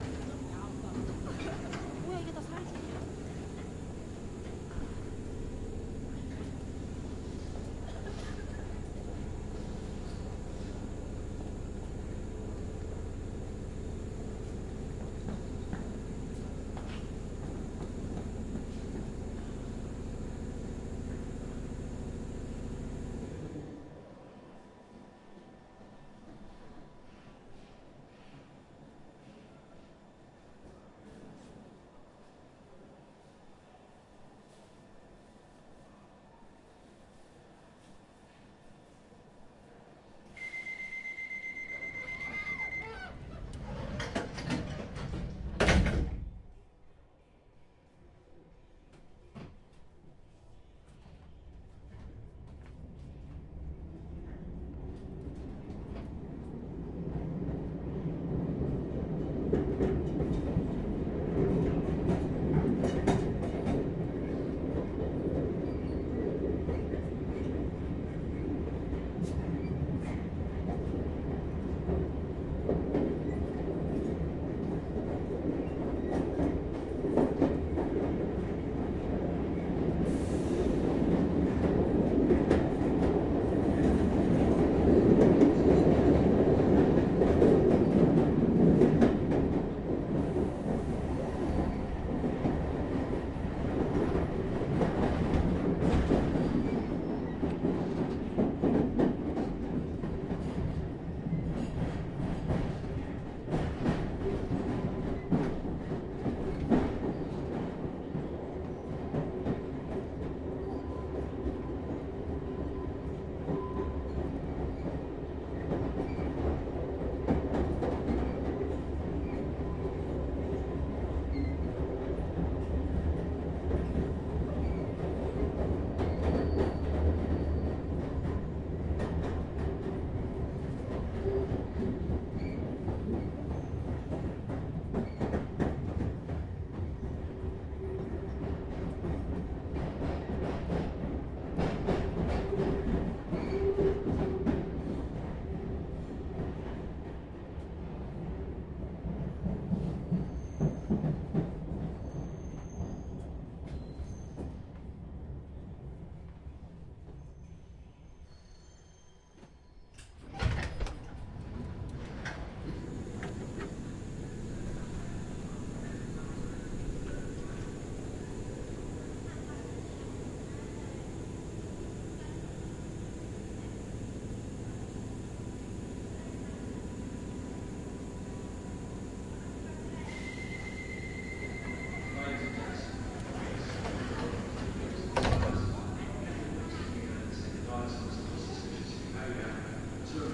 London tube ride
Sequence of London's tube: Entering the train (stereo panorama wobbles a bit here..), door closes with alarm, ride to the next station via tunnel, door open and close. Very few people's voices only, distant announcement at the end (cut). Recorded with Zoom H4 on-board mikes
ambience, london, underground, walla, subway, tube